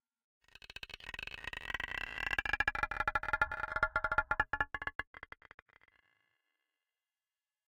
Electro stone 4
abstract, Alien, design, digital, effect, electric, Electronic, freaky, future, Futuristic, Futuristic-Machines, fx, lo-fi, loop, Mechanical, Noise, peb, sci-fi, sfx, sound, sound-design, sounddesign, soundeffect, Space, Spacecraft, Stone, strange, UFO, weird